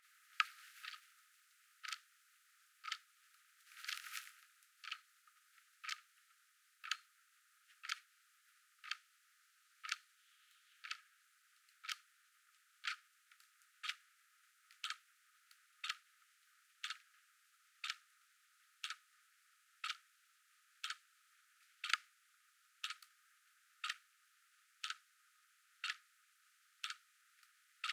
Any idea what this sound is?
Ticking Clock
tic-tac, tick-tock, clockwork, tac, wall-clock, clock, tick, ticking, ambience, time